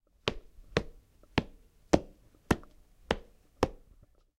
foot stomping on linoleum